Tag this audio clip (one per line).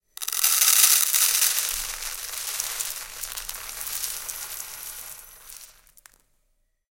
ripple
pour
noise
crack
run